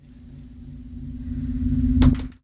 Tape echo feedback, ending abruptly with the sound of a switch. Late 1970s Akai reel-to-reel tape deck.